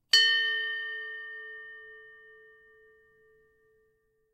Ship Bell Single Ring
One chime of a small bell used on ships, (can also be used for old firefighters).
naval, ding, ships-bell, dong